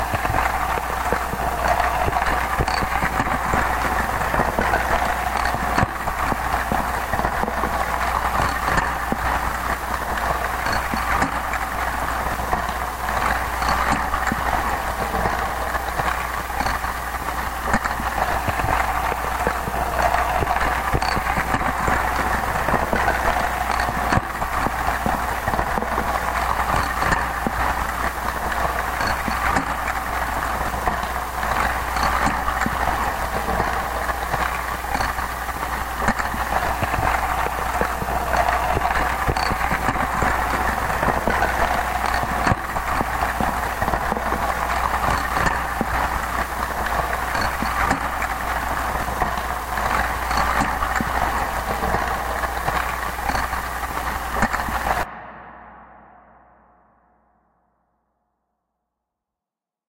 Merry Christmas
50 jingle bells in a carton, with a contact mic attached, slowly rotated.